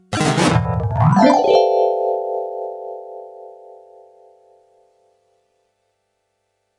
Yamaha PSS-370 - Sounds Row 4 - 20
Recordings of a Yamaha PSS-370 keyboard with built-in FM-synthesizer